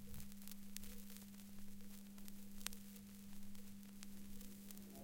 vinyl loop
Real vinyl noise recorded from and old vinyl from the 70s
Turntable -> sound mixer -> Zoom H4n
noise, vinyl, vinilo, cracking, crunchy, crujiente